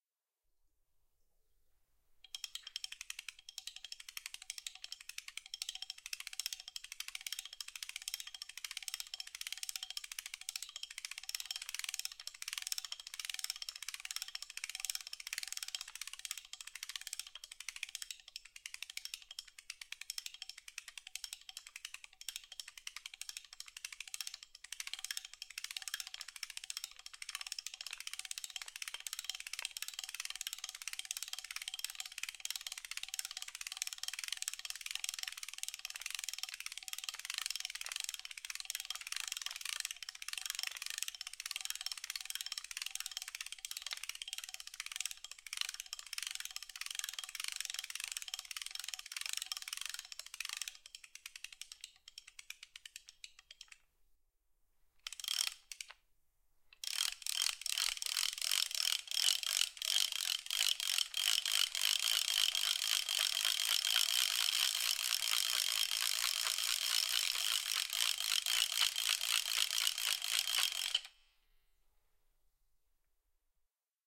taladro/drill/motor/engine
a sound make of manual drill and I used for diferent sound effects, recorde by a NW-700
drill, engine, factory, foley, industrial, machine, machinery, manual, mechanical, motor, robot, robotic, taladro, toy